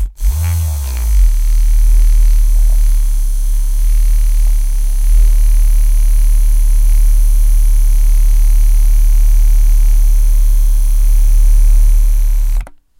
Bass Milk Frother
really low bass frequencies from a milk frother
Base, Bass, Frother, Milk, Sinus, deep, dubstep, low, low-end, yeah